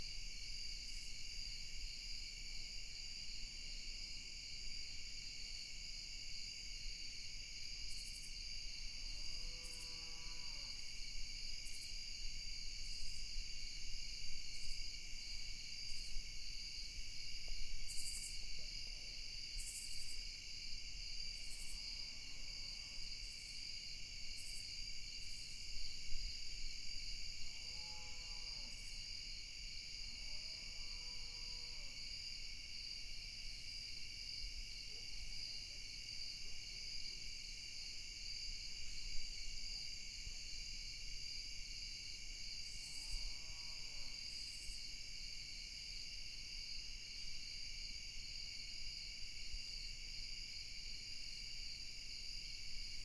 Stereo Zoom H4 recording of a quiet autumn night in the Midwest.
field-recording, night, nighttime, outdoors, stereo, time, fall, nature, ambiance, autumn, ambience